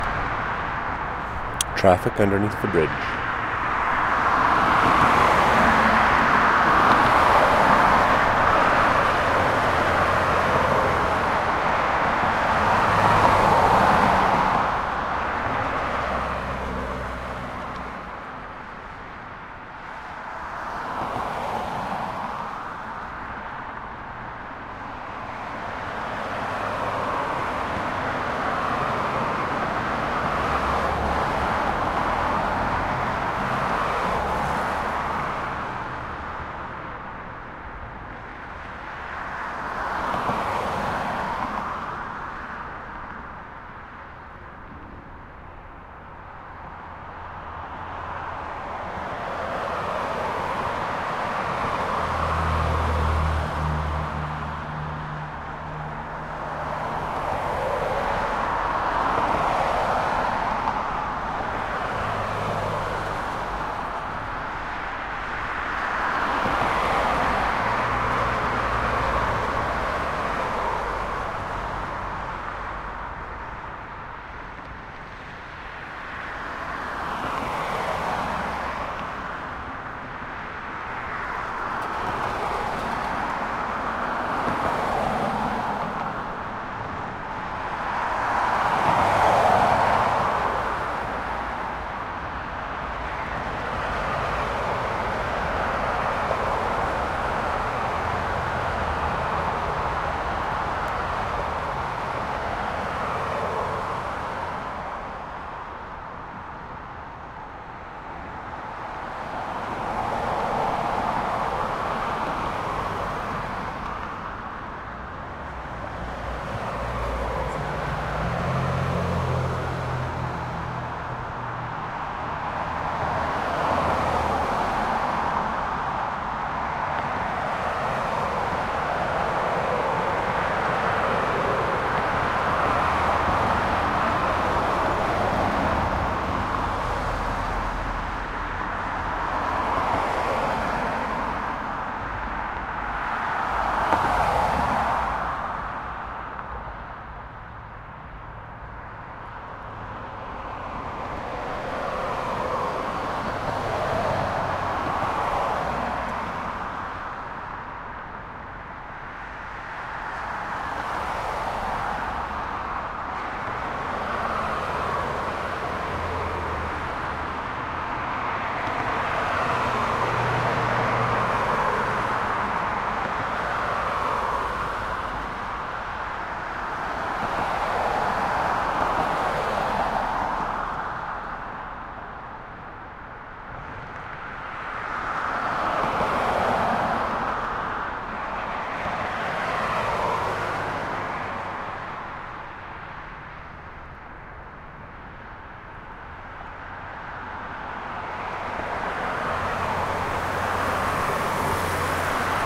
DVP Traffic Under Bridge
parkway, don, toronto, bridge, valley, subway, traffic, under, bys